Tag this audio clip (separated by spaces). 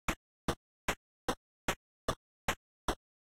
footsteps step walking old steps loop foot video game foot-steps retro